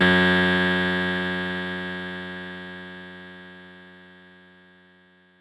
fdbck50xf49delay11ms
A 11 ms delay effect with strong feedback and applied to the sound of snapping ones fingers once.
feedback, delay, synthetic, echo, cross